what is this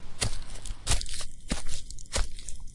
Heavy steps through a ground with fallen leaves.
foliage, footsteps, forest, ground, leaves, steps, thump, walk